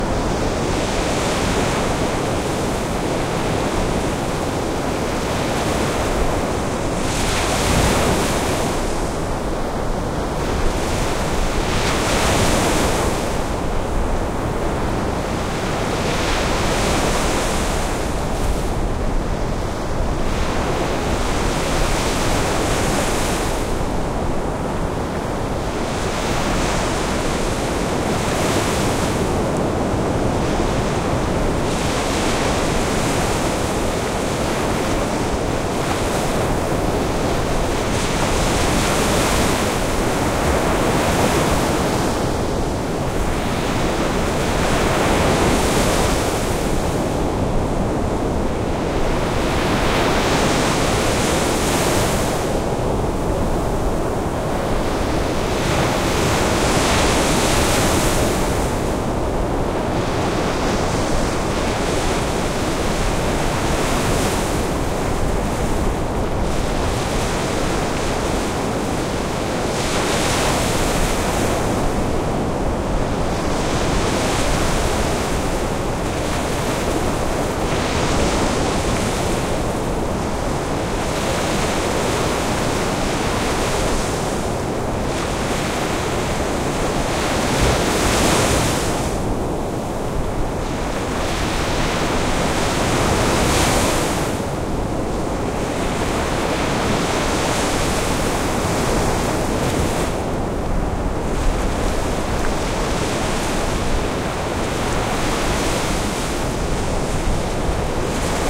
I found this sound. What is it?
water baltic sea storm waves crushing hard close distance perspective pointing at shore stereoM10
This sound effect was recorded with high quality sound equipment and comes from a sound library called Baltic Sea which is pack of 56 high quality audio files with a total length of 153 minutes. In this library you'll find various ambients recorded on the shores of the Baltic Sea.
ambience, ambient, atmo, atmosphere, baltic, distant, heavy, location, noise, sea, soundscape, splash, splashes, swell, water, watery, wave, waves, whoosh